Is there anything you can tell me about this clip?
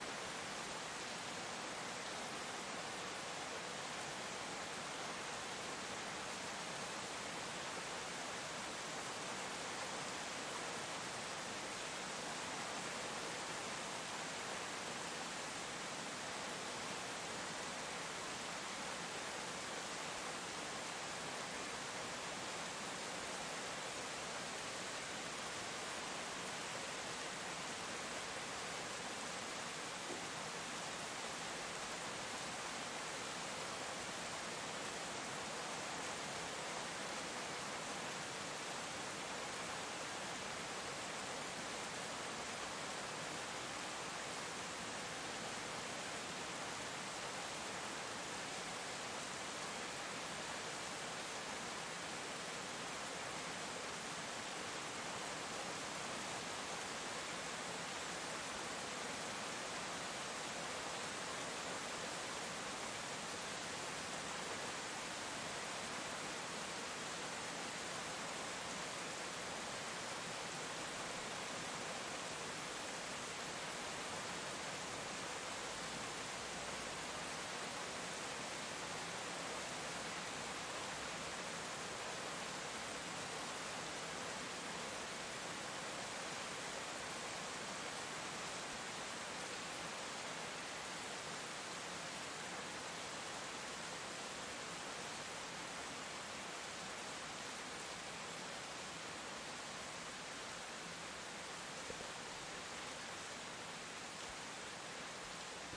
Field recording of the rain in the neighborhood
field-recording, weather, thunderstorm, nature, urban, films, rain, storm, thunder